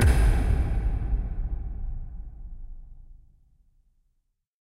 Large sets of industrial lights turn on. Created by mixing sounds by FreqMan and some of my own sounds.